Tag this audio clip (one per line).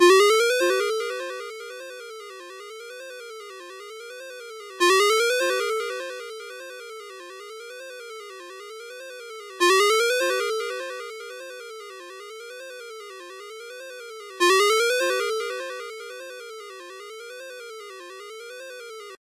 alarm
alert
alerts
arp12
cell
cell-phone
cellphone
mojo
mojomills
phone
ring
ring-tone
ringtone